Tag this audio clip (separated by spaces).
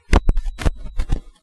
digital static electronic glitch ruffle noise